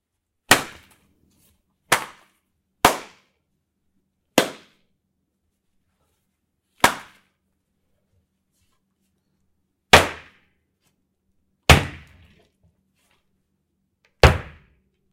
smack-hands
Hands smacking together
skin, hands, smack, rub, smacking